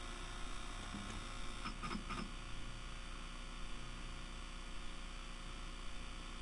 While the tape is playing in the VCR pushing the pause button and then going back to play.Recorded with the built in mics on my Zoom H4 inside the tape door.
drone, motor, pause, tape, transport, vcr, whir